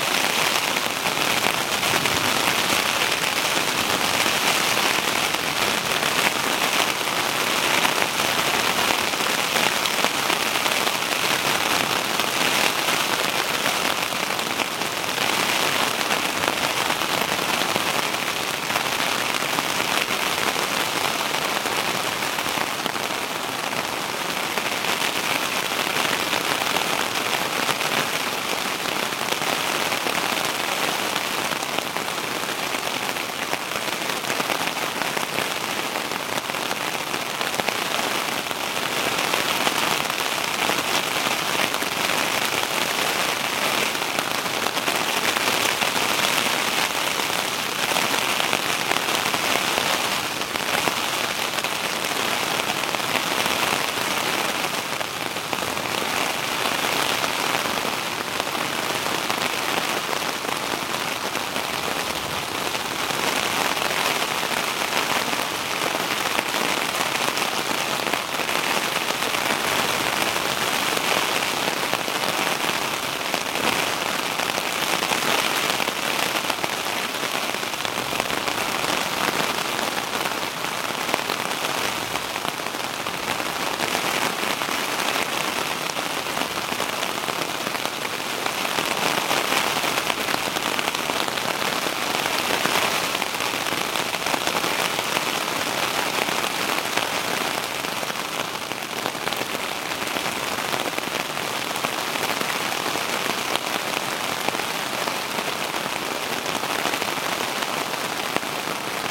Rain on canvas tent
Rain falling on canvas tent while camping in Exmoor. Recorded with Canon D550.
drip, static, field-recording, wet, rain, dripping, water, splash